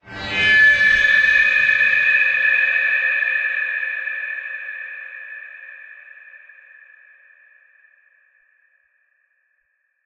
Dark Texture 4
Sound for Intro to your movie or game horror story.
Enjoy! It's all free!
Thanks for use!
background, dark-ambient, booom, scary, pad, zombies, dark, hollywoodfx, intro, fx, movie, cinema, trailer, deep, horror, creepy, dark-texture, film